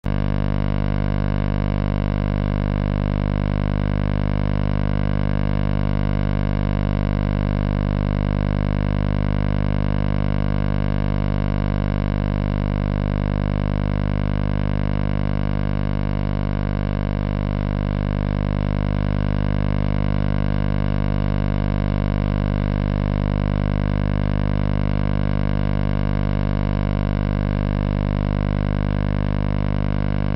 Soft Saw
Found while scanning band Radio
bending, Broadcast, circuit, FM, lo-fi, media, noise, radio, Sound-Effects